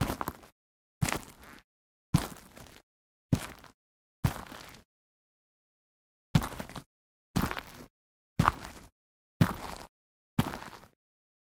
Footsteps Boots Gritty Ground (Gravel)
Footsteps (boots) on gritty ground (Gravel): Walk (x5) // Run (x5)
Gear : Tascam DR-05